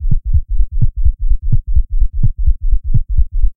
RIVIERE Anna 2017-2018-Heart beat sound
I produced this sound only with audacity, 100% synthesized.
To create this sound on Audacity, I first generated one chirp with a frequency of 95 (Hz) to 1 (Hz) and an amplitude of 0.65. Then I generated two other chirps, for each of them I changed the frequency and the amplitude. I increased the frequency of each and I lowered their amplitude. I precisely modified the disposition of the different sounds to create a quick heart beat. After the mix and render, I added an effect of fade in at the beginning of the sound. Then I added an effect of invert on the whole sound. I copied the sound several times to create a repetition of the heart beat. Then I put some silence and I modified the speed, the tempo and I used the reverb effect.
To make the sound more realistic I also modified the bass and treble. At the end I changed the tempo again to have a quick and deep heartbeat.
Typologie/Morphologie de P. Schaeffer
Descriptif :
heart-beat, fear, stethoscope, heartbeat, heart, afraid, nightmare, panic, scared, fearful, anxiety